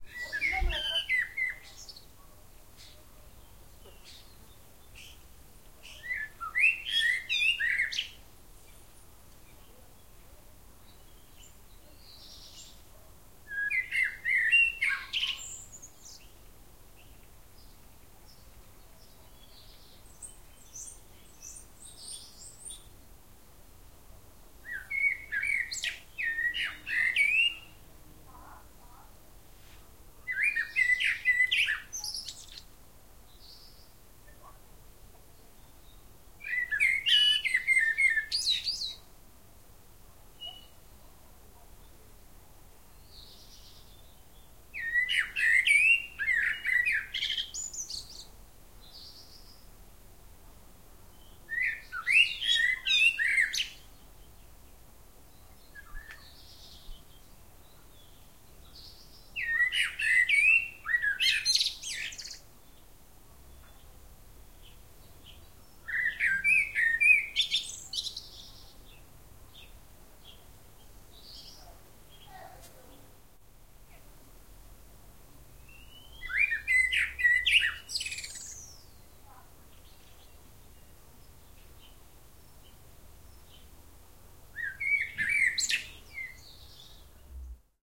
-64 OLOMUC BIRDS nicely separated one with quiet background
Recording of a birdsong in Olomuc, Czech Republic.
bird, birdsong, field-recording, nature, spring